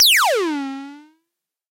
EH CRASH DRUM49
electro harmonix crash drum
drum, electro, crash, harmonix